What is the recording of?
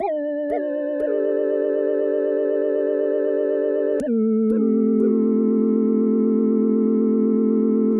Asian sinewaves
Some sines I made in Logic pro X with the ES2 synthesizer.
1 Chord notes: D F G A C D
2 Chord notes: A C D F G A